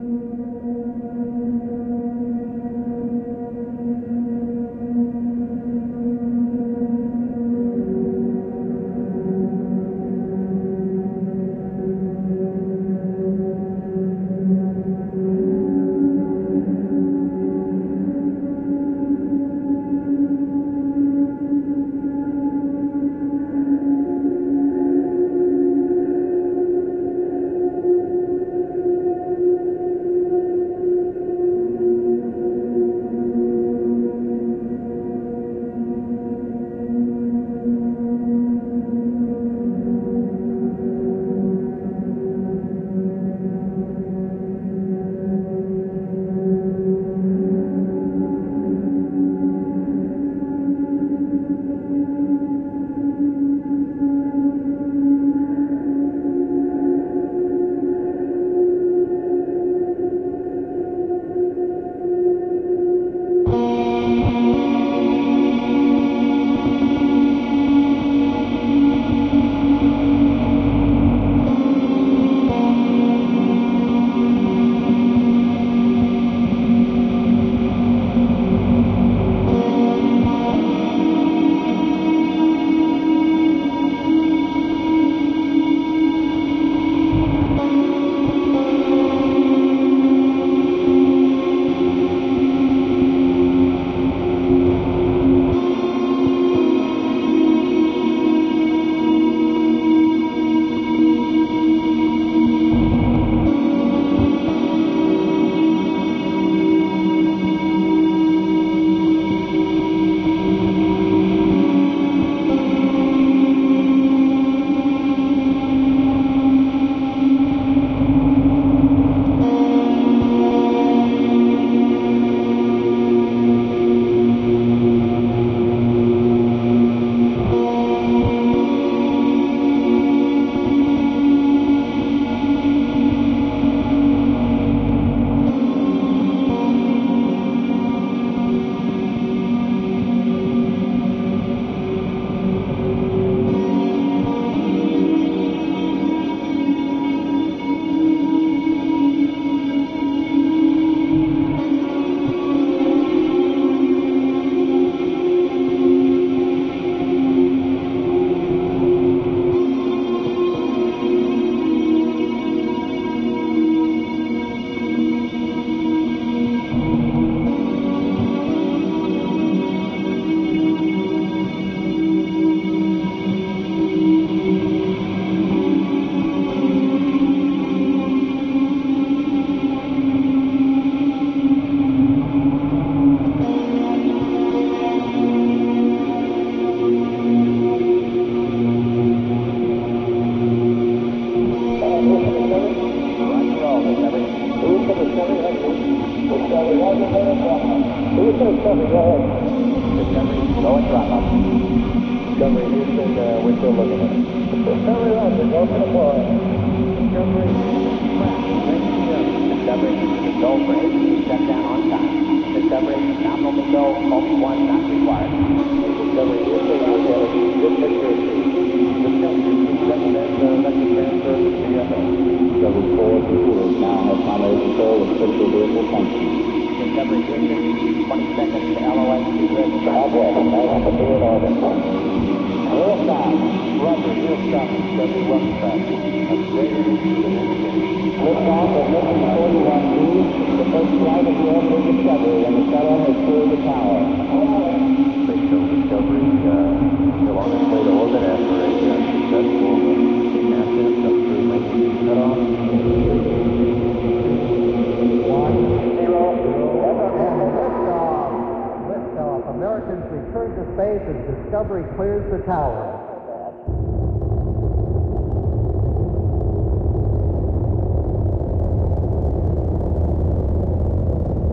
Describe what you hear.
ambient space rock2M
i make this song by bass guitar in ableton live.